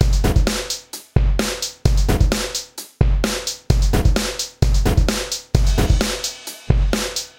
Produced for music as main beat.